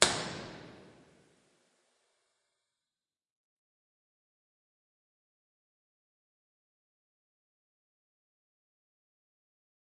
IR artisan garage B1 01 sweep 3.0s-fade stereo noise filter dc
Impulse response generated from field recordings of a parking garage. Recorded using an Audio Technica BP4029 M/S shotgun microphone into a Zoom H4n recorder. This recording is part of a pack of impulse responses comparing different recording and post-processing techniques.
Sweep recordings were deconvolved using Voxengo Deconvolver.
Sound Design, Music Composition, and Audio Integration for interactive media. Based in Canberra, Australia.
convolution, car-park, reverb, stereo, impulse-response, garage, IR